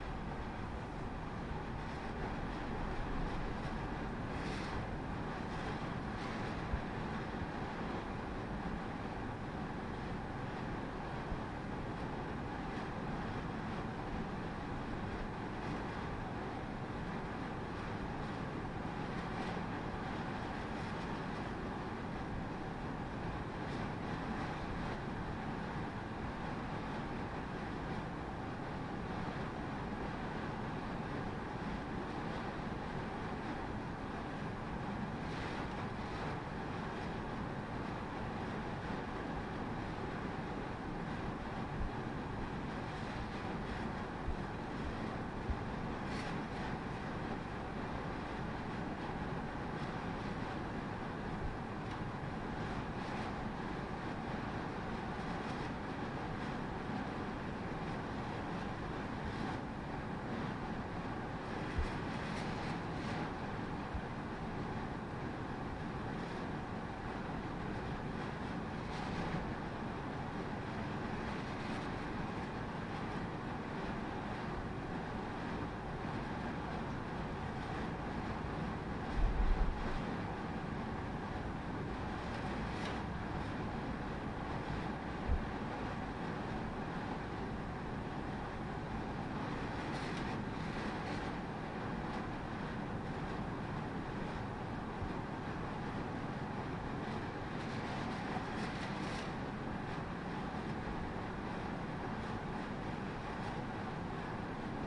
Air conditioner in heating mode
A samsung inverter air conditioning system in heating mode. Big size room, circa four meters away from the microphone. Recorded with a Zoom H1.
vent, heater, air